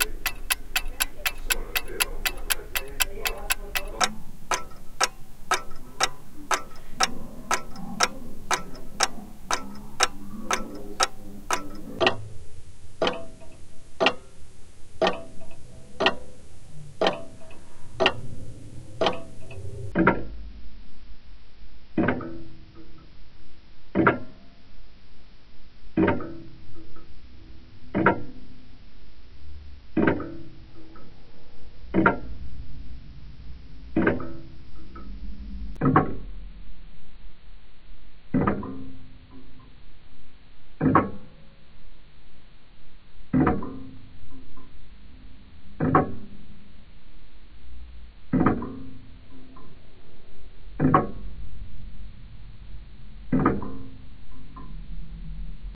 Tabletop clock ticking at various speds, slowed down
A bog standard, small, plastic tabletop Prim clock, made in Czechoslovakia in the '70s or '80s maybe.
- original
- quarter (24 kHz)
- eighth (12 kHz)
- tenth (9600 Hz)
clock, slowed